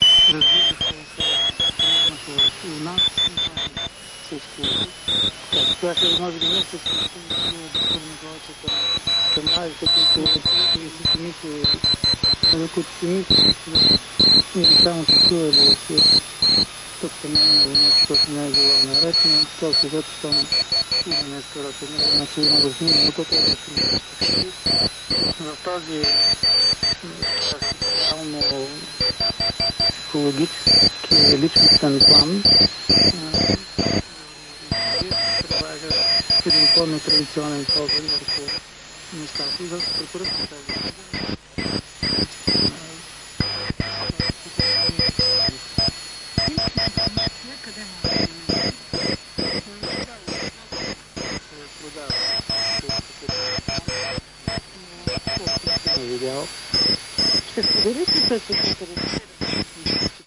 Morse signal station + radiophonic locution.
radio, static, noise, locution, shortwave, electronic, morse